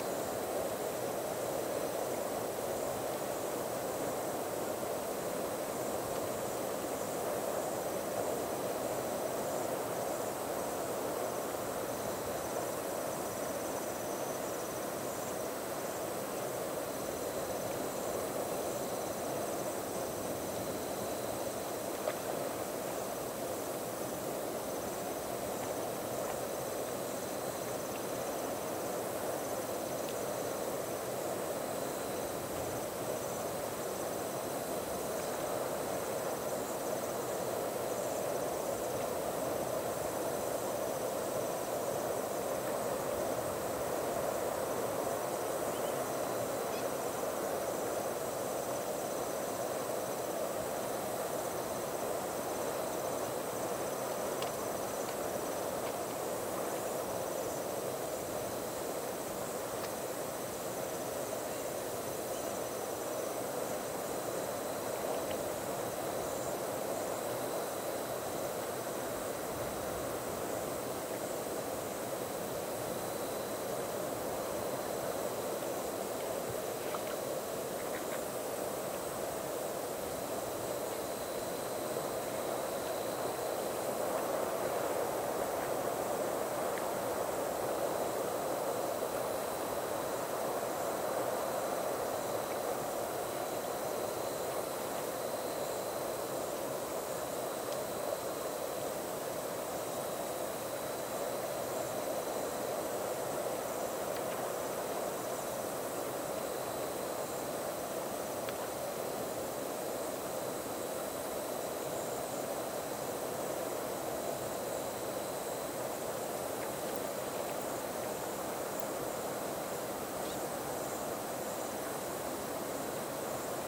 GRILLOS OMAN
Night has fallen over the impressive Khor Rori arceological site in Dhofar, Oman. (Mono 48-24; Rode NTG-2 Shotgun Mic/PMD 660 Marantz Portable Recorder.)
ambience, crickets, night